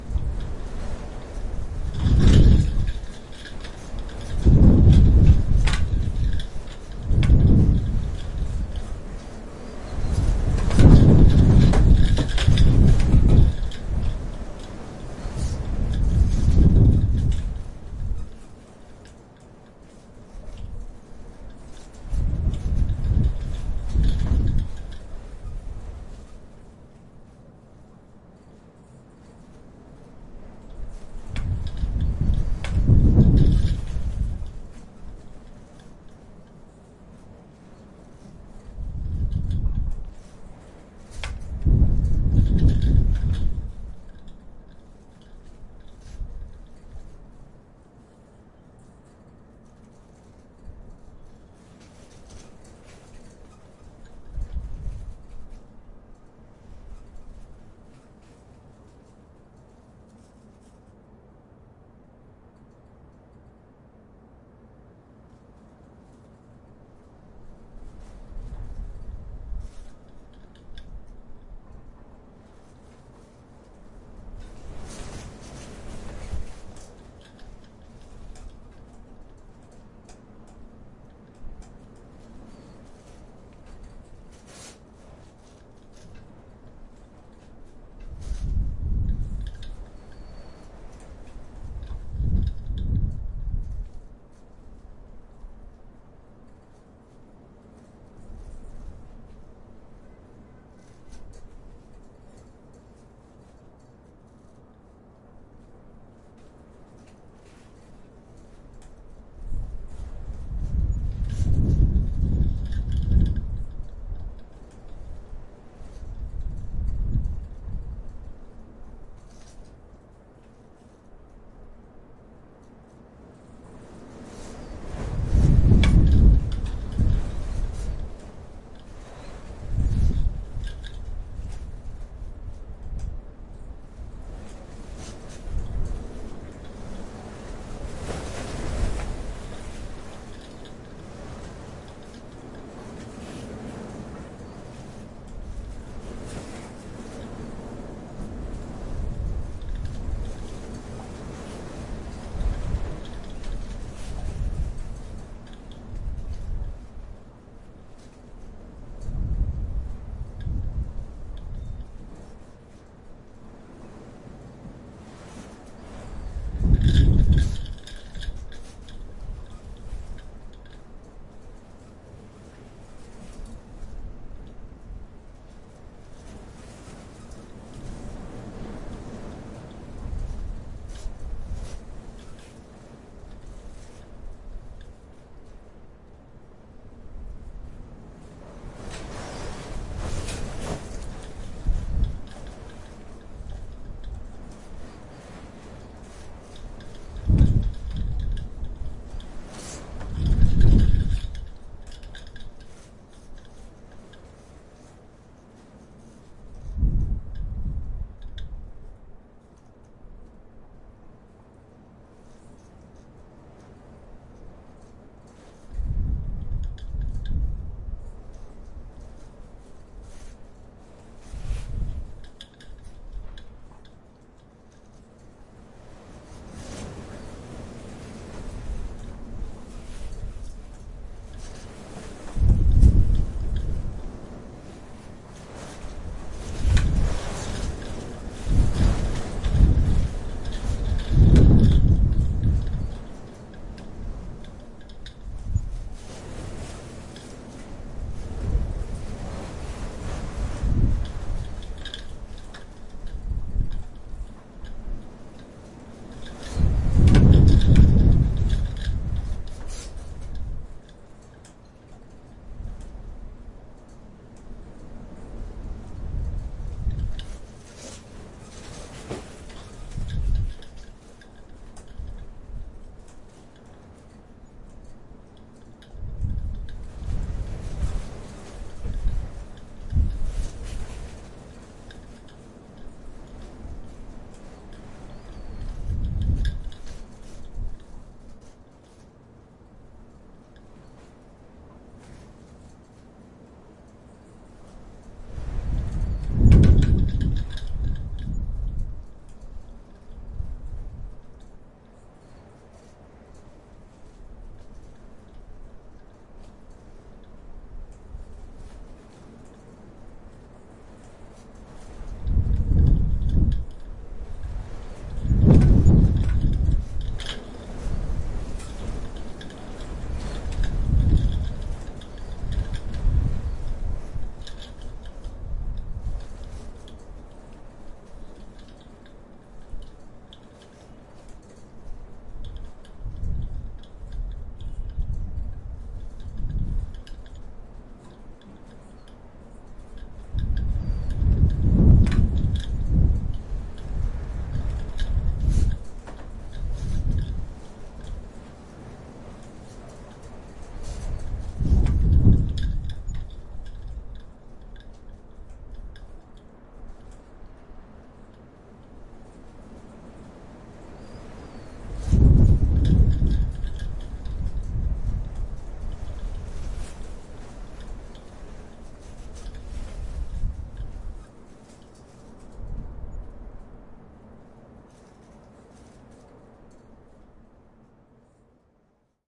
Storm on roofgarden
Storm and rain on a roofgarden in Berlin-Neukölln, european windstorm "Niklas" sweeps across Berlin. Recorded with an Olympus LS-11.
Berlin, field-recording, nature, rain, storm, weather, wind